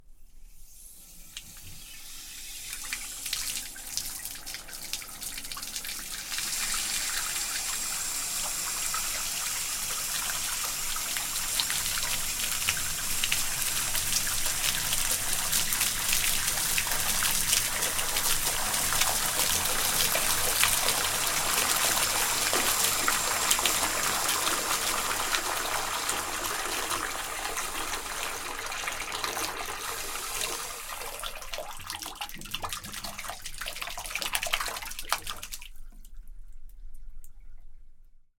pouring water into the bath (one water tap)
Pouring water into the bath by one water tap.
Open water tap, pouring water, close water tap, residual water leaving the bath, water drops.
Mic: Pro Audio VT-7
ADC: M-Audio Fast Track Ultra 8R
bath, tap